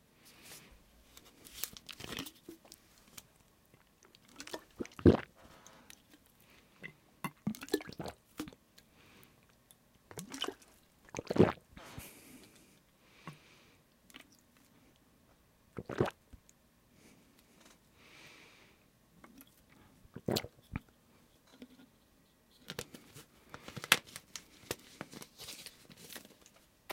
Water, Drinking, Swallow